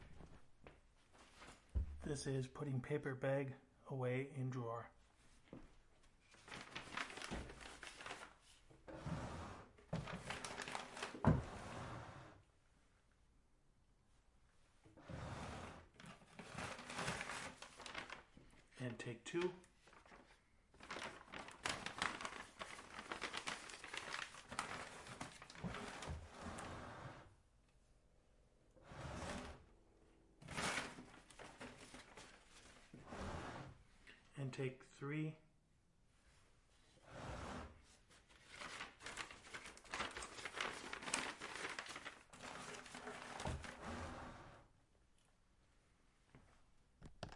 FOLEY Putting paper bag in drawer 1
What It Is:
Me putting a paper grocery bag into a drawer.
A mother putting a paper grocery bag into a drawer.
bag, AudioDramaHub, paper, groceries, kitchen